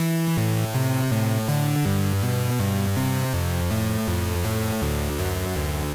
Backing Synth 2
Backing synth used in Anthem 2007 by my band WaveSounds.
162-bpm, distorted, hard, synth